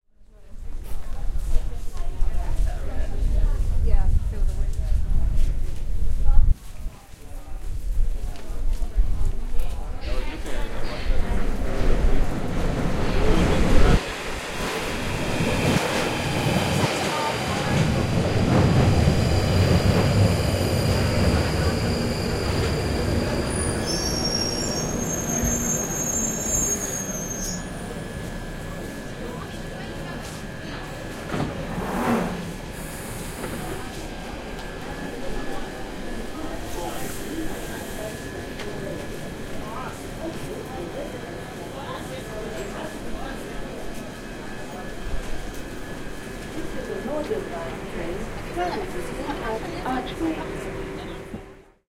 London Underground - London Bridge Station
A recording I captured from the perspective of a platform at London Bridge station underground in M/S with my sure MV88.